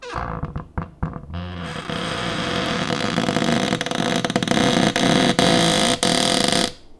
One in a series of some creaks from my cupboard doors. Recorded with an AT4021 mic into a modified Marantz PMD661 and edited with Reason.
close, door, cupboard, kitchen